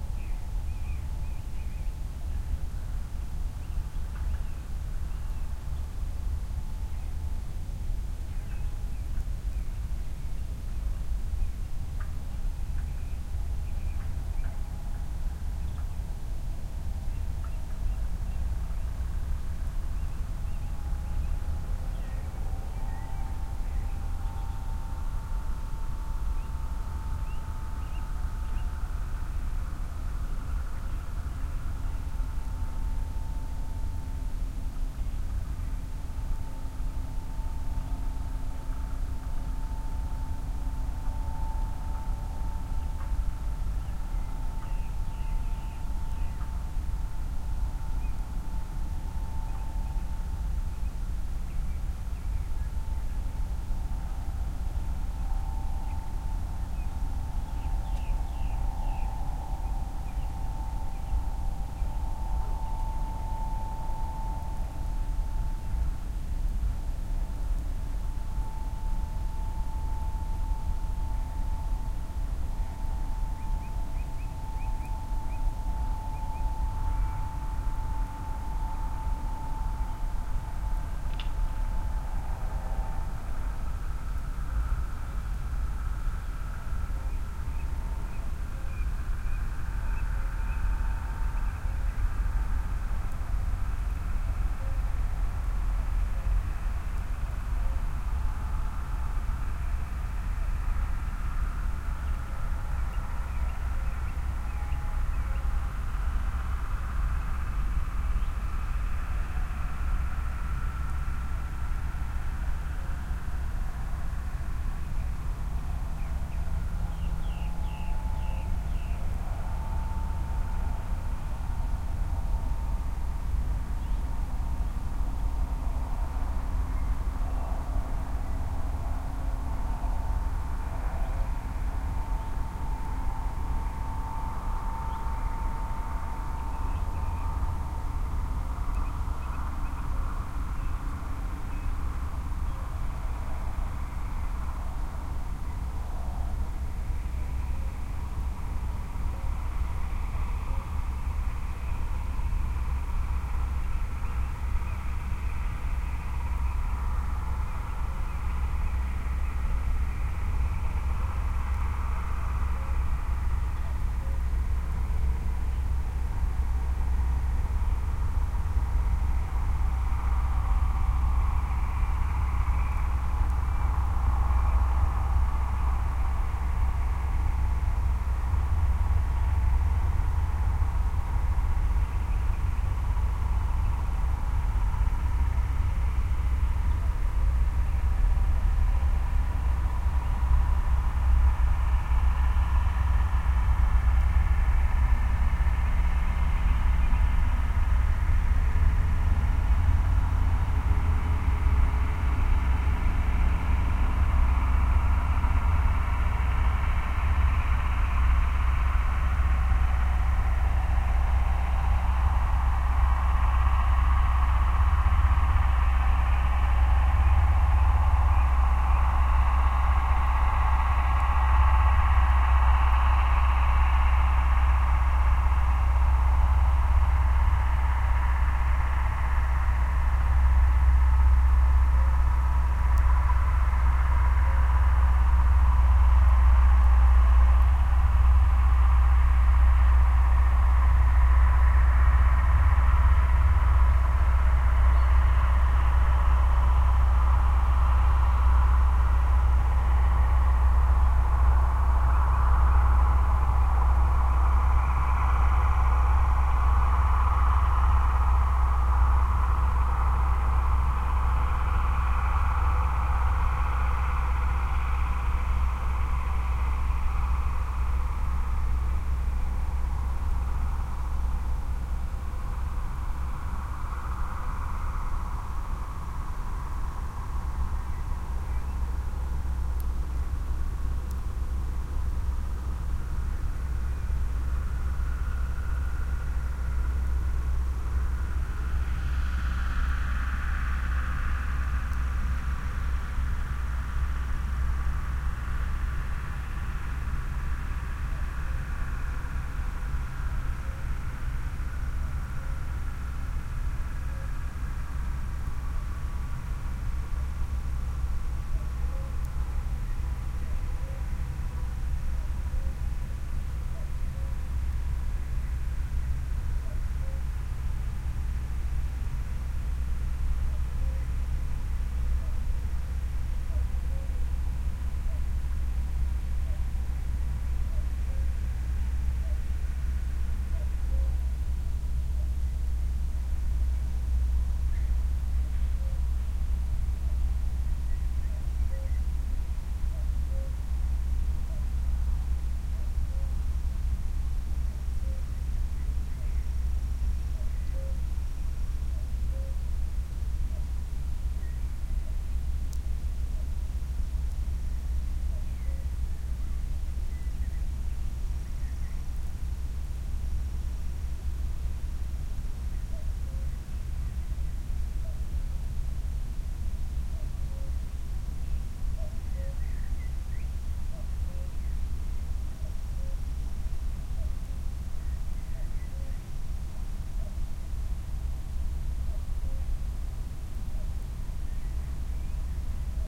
farmers driveby harvest
A farmer and his tractor drives by the microphones, up close.
Sony HI-MD walkman MZ-NH1 minidisc recorder and two Shure WL183
farmers, fields, farmer, machines, machine, work, noise